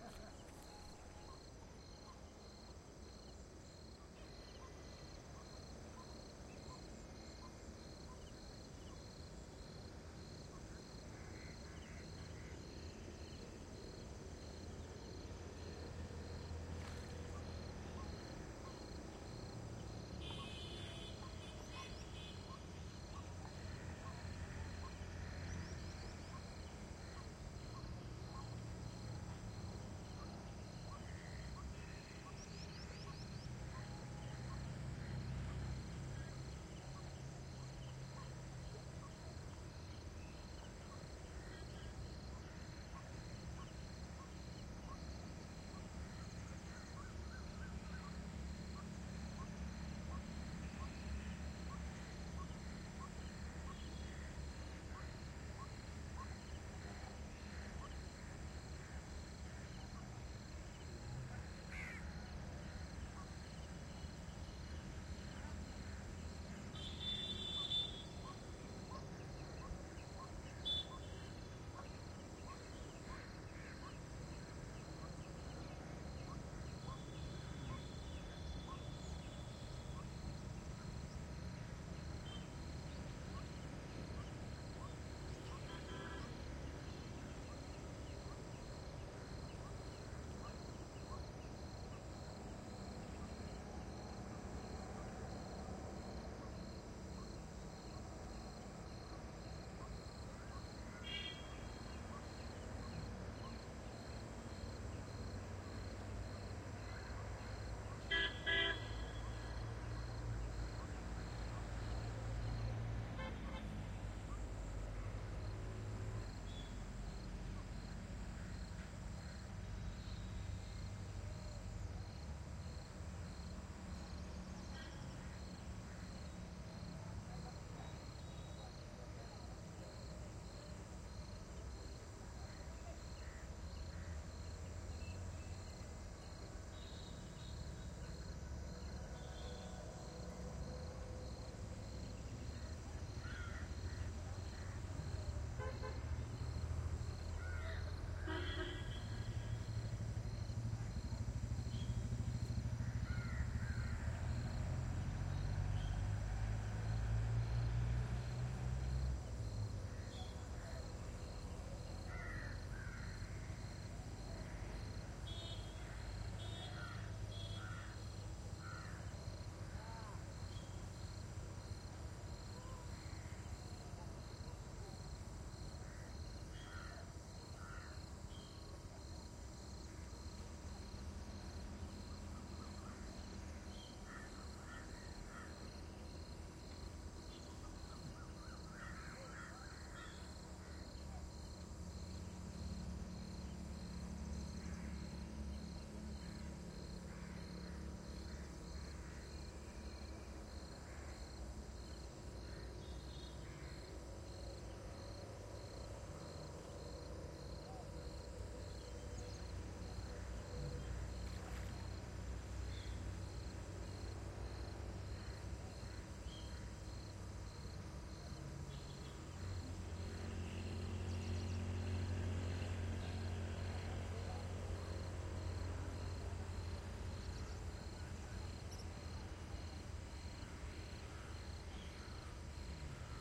India, Kolhapur , in the countryside, close from a river a general atmo with traffic in the distance at the sunset
Schoeps ortf